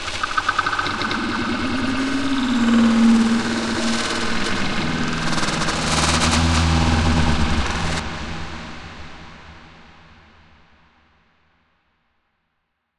Processed Babbling Brook 3
Originally a recording of a brook in Vermont (see my Forest Ambience sound pack), time stretched and pitch shifted in BIAS Peak and processed with Sean Costello's Valhalla Room reverb.
brook, stream, processed, time-expansion, field-recording, abstract-sound